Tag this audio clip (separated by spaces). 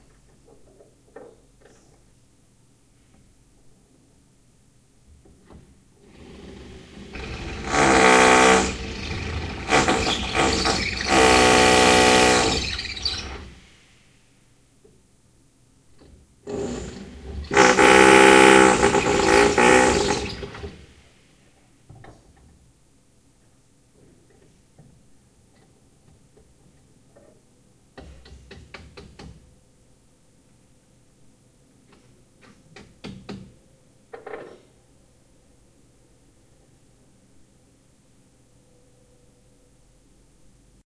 handwerker bohrmaschiene drill craftsman hammer